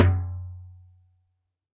Doumbek Doum1
Recording of my personal Doumbek 12”x20” goblet hand drum, manufactured by Mid-East Percussion, it has an aluminum shell, and I installed a goat-skin head. Recording captured by X/Y orientation stereo overhead PZM microphones. I have captured individual articulations including: doum (center resonant hit), tek (rim with non-dominant hand), ka (rim with dominant hand), mute (center stopped with cupped hand), slap (flat of hand), etcetera. In addition I have included some basic rhythm loops which can be mixed and matched to create a simple percussion backing part. Feedback on the samples is welcome; use and enjoy!
African, Darabuka, Djembe, Doumbec, drum, dumbek, Egyptian, hand, Middle-East, percussion, Silk-Road, stereo, Tombek